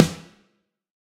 Processed real snare drums from various sources. This sample mixes typical snares from both the eighties and nineties.
drum, real, sample, snare